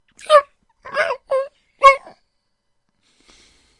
Crying animal
animal, crying, dog